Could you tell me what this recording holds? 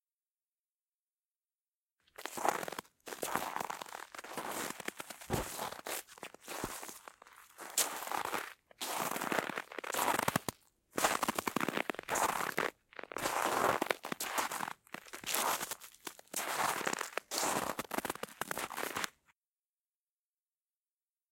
Walking in the snow.
walking, Panska, footsteps, snow